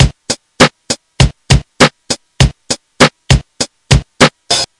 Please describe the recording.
8 beat drum-loop sampled from casio magical light synthesizer
dance, drum-loop, rhythm, beat, casio